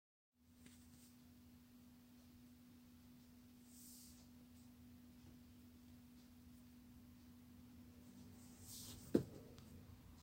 Cat jumping onto carpet